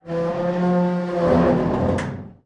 Metallic squeak
Basically, that's the sound of an old locker by opening and closing.